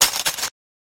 Selfmade record sounds @ Home and edit with WaveLab6